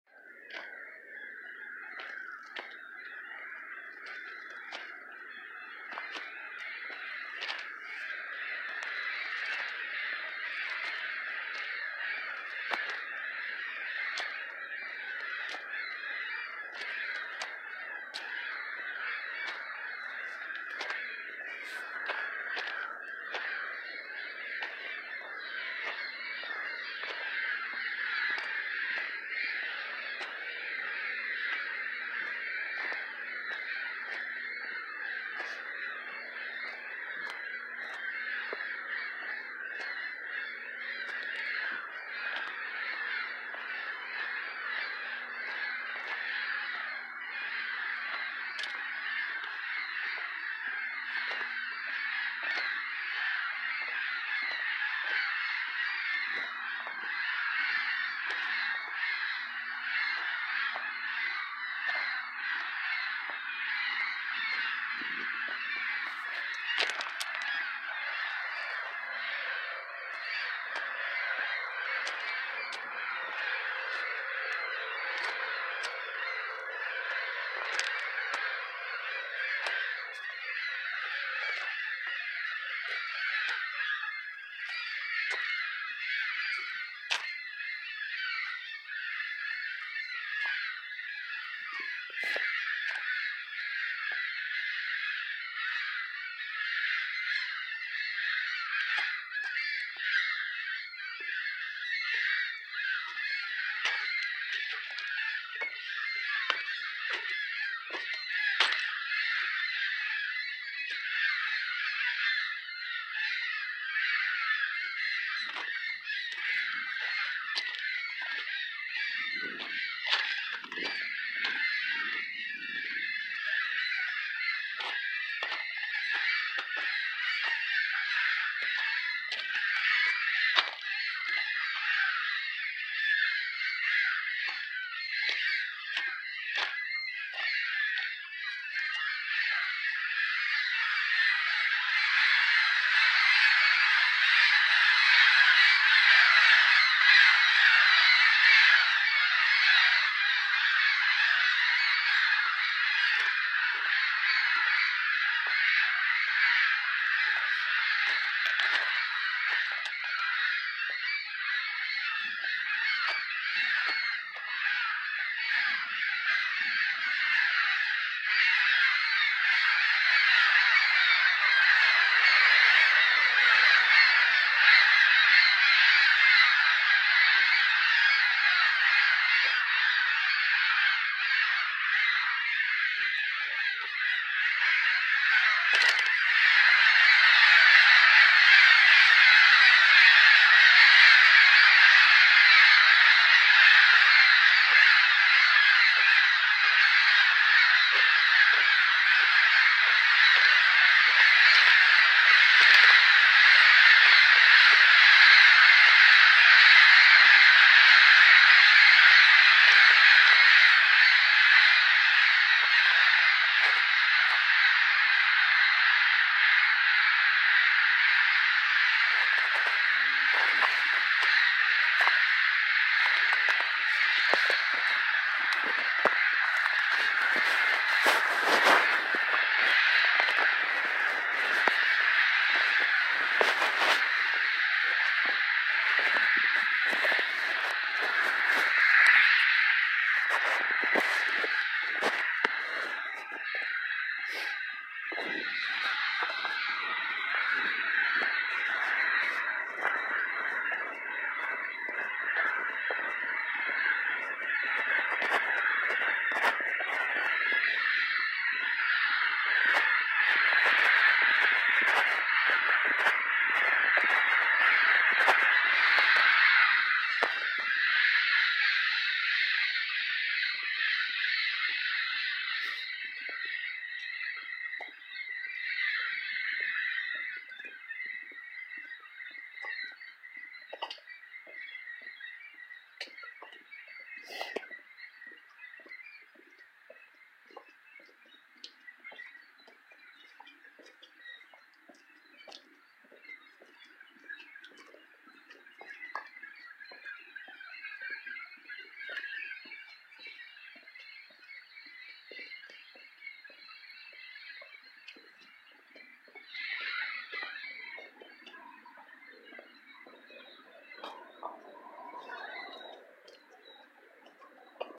Seagulls circling overhead
I walk towards an area where hundreds of seagulls fly in a giant circle. The seagulls are directly overhead in the middle of the recording.